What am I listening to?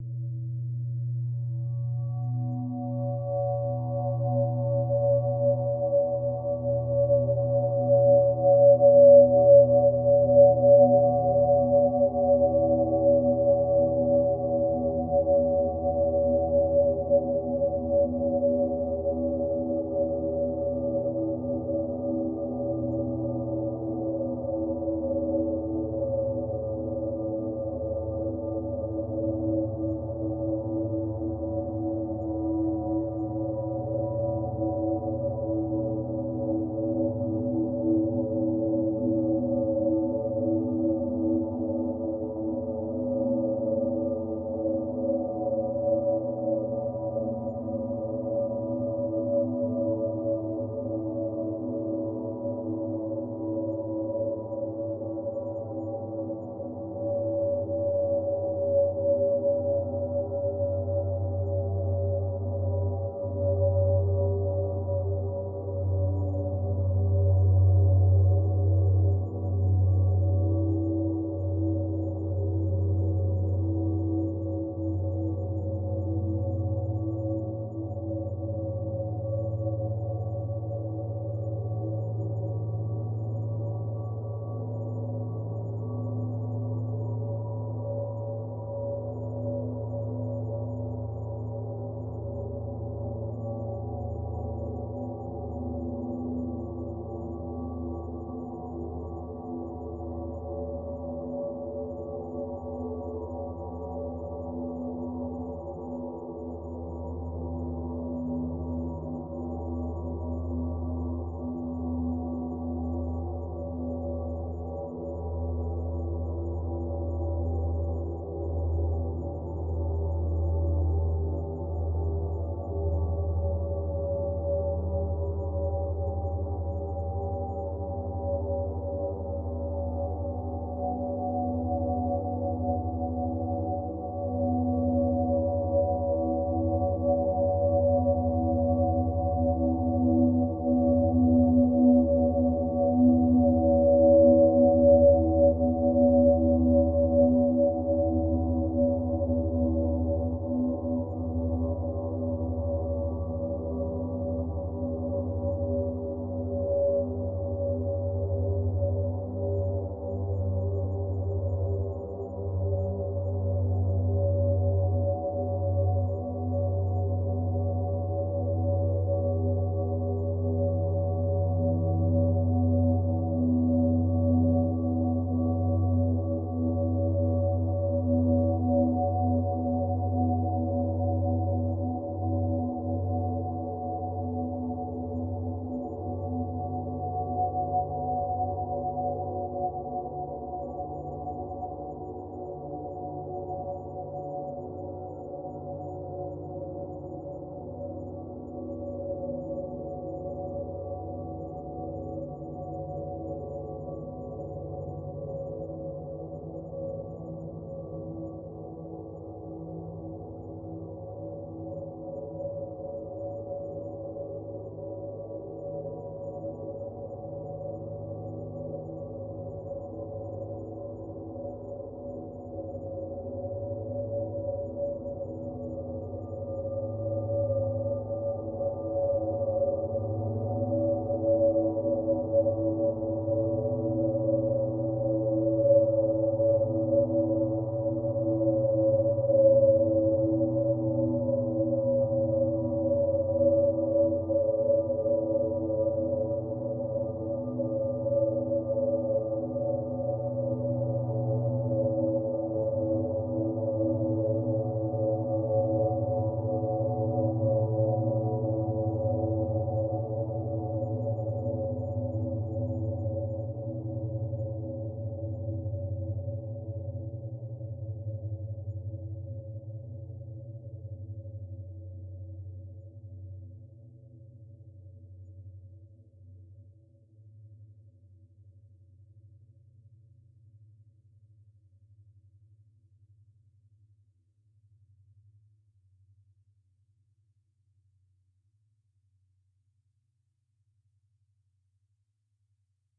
ambient sound created witg f(/%&ing; much reverb
ambient, deep, field